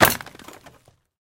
Breaking open a wooden crate.